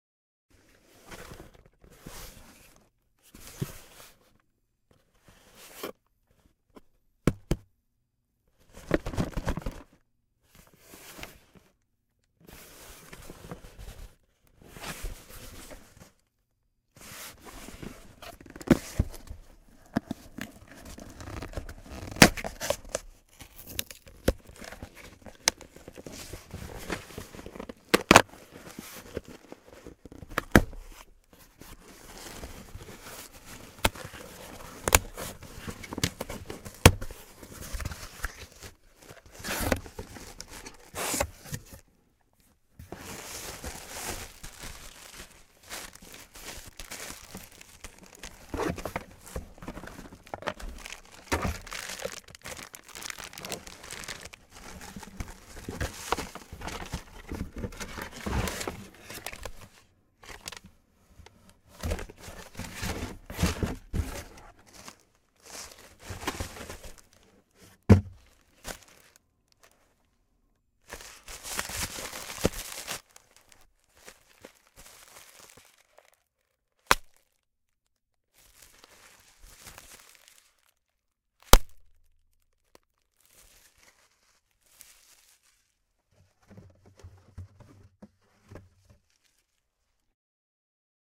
Handling and opening a box from Amazon
Handling and opening a medium-sized Amazon box. Ripping the package open after shaking it. Pulling out the plastic air pouches and multiple small products from the box. Rustling items around. Setting items down on a desk. Throwing the box.
Recorded in my studio on a Neumann TLM 103 microphone.
amazon, handle, opening, packaging, plastic, shake, squish, ziplock